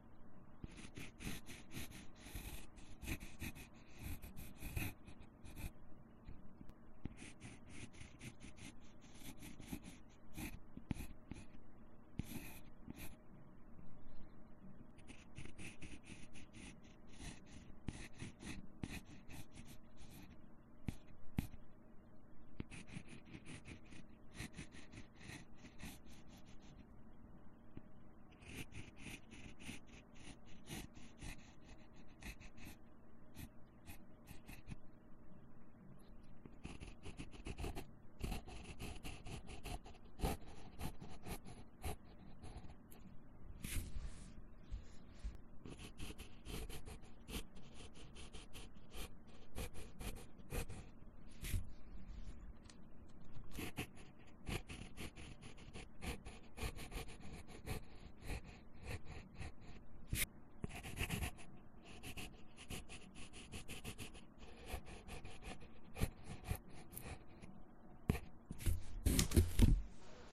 Writing on cardboard with a pencil
Just me writing and drawing with a pencil on cardboard to imitate the sound of sketching on canvas.
writing, write